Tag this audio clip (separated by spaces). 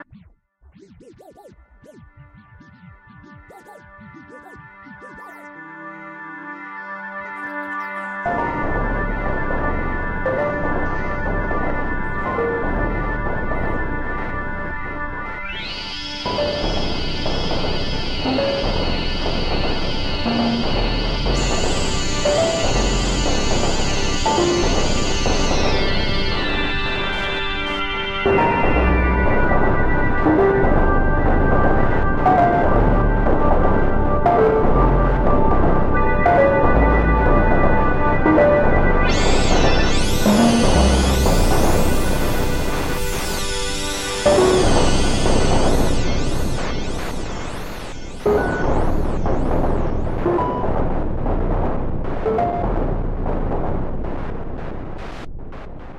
weird experimental sound-drama